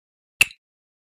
Different Click sounds